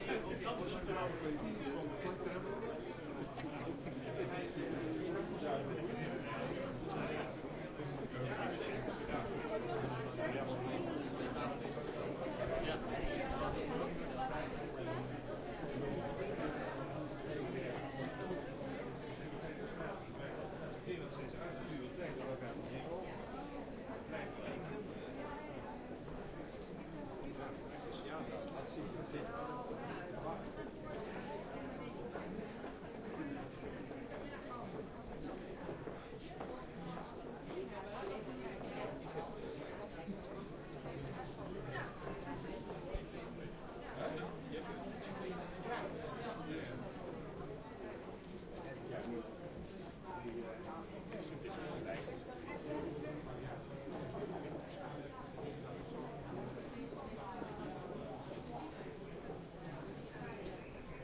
people talking in a large atrium of the university medical center Groningen in the Netherlands. recorded during a break between sessions Zoom H2 field recording
conversation, hospital-hall, people, scientific-meeting, talking